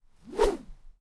Raw audio of me swinging bamboo close to the recorder. I originally recorded these for use in a video game. The 'B' swings are slightly slower.
An example of how you might credit is by putting this in the description/credits:
The sound was recorded using a "H1 Zoom recorder" on 18th February 2017.